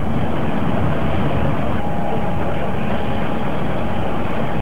experimental sound recorded with my handy and after that cutted in soundforge.
this one is the noisy sound the environment and surrounding did.
now i think its a nice sequence.
best wishes!
ambience, ambient, atmo, atmosphere, electronic, experimantal, field, live, loop, record, sequence